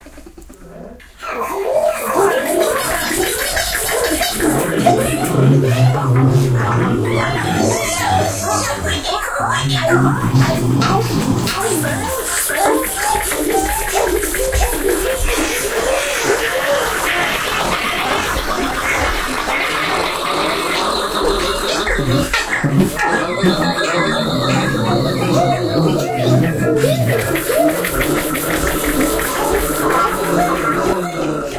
Alien Voices
Recorded friends and used Audacity effects to create an alien menagere.
zoo, scifi, alien